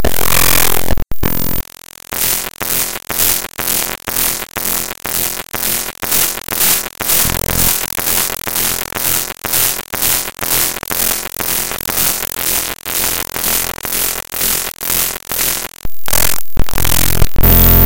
Imported raw into audacity.
image, map